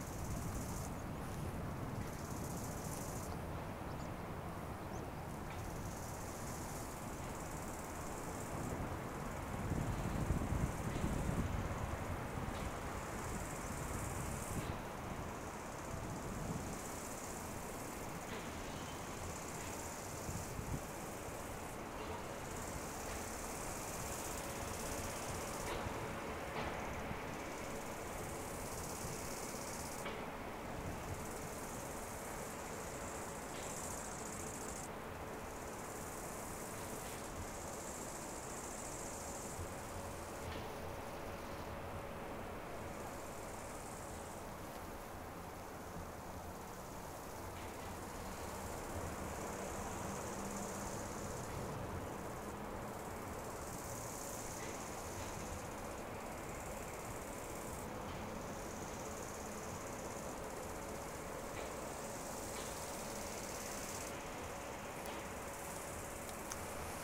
at the airport
Ambiance at a little airport in france, some sounds of periurban traffic, hangar and nature